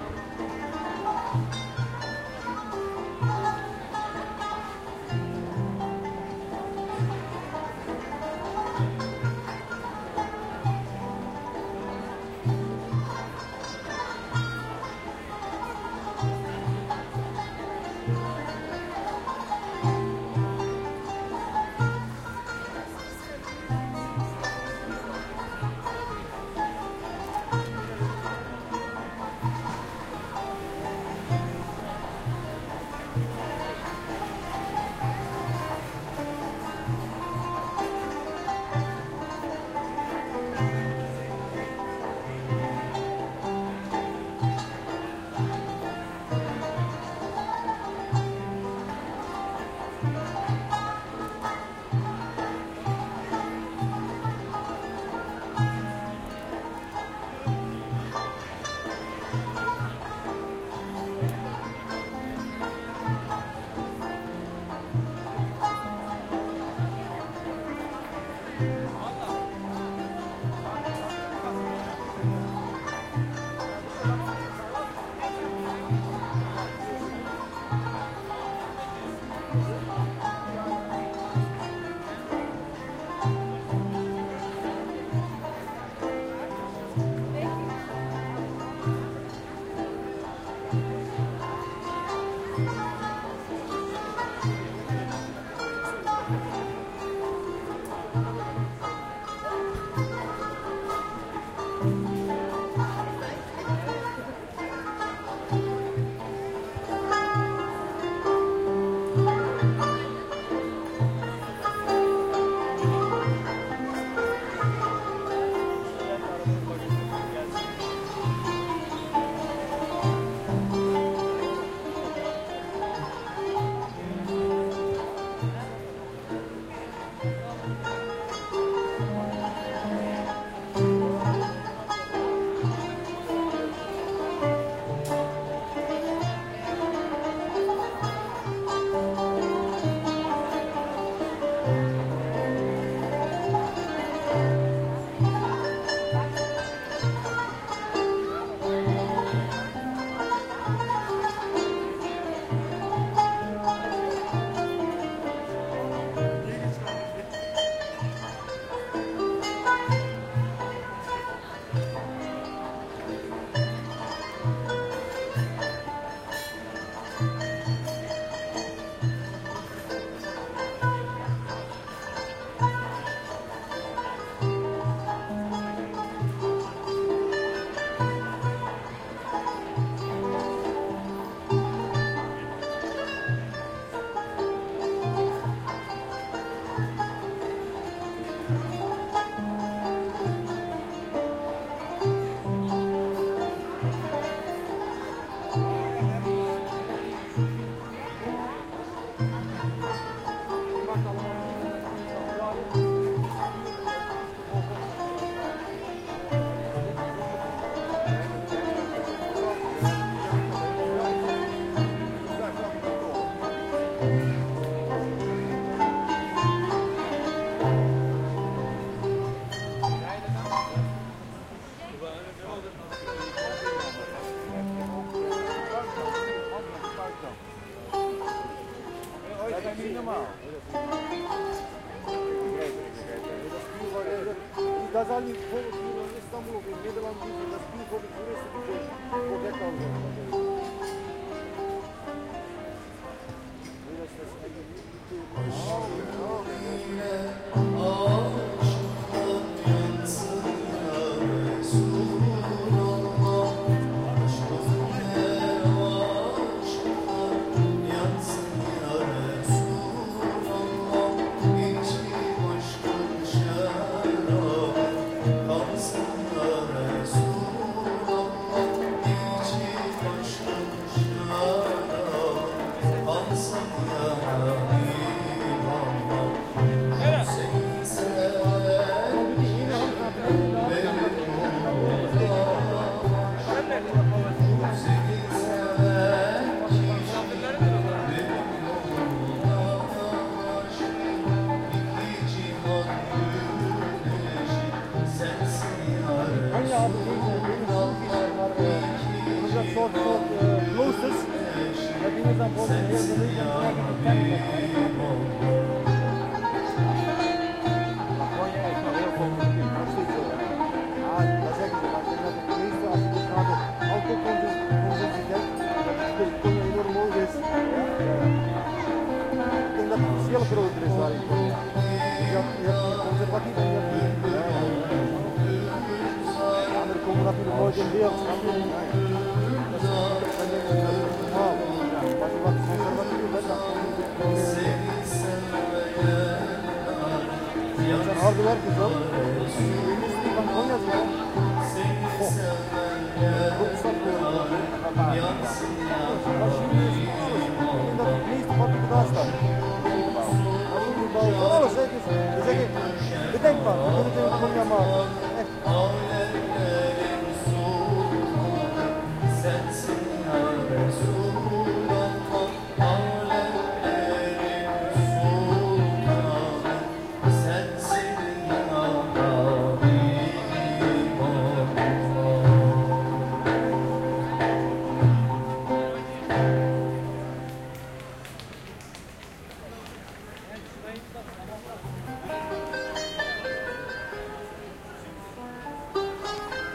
Istanbul Dervish Cafe music
Street recording made on August 8, 2011 in Istanbul's central area Sultanahmet near the Dervish cafe.
Singing man, turkish music and street sounds.
p.s. here's a short video of this moment :)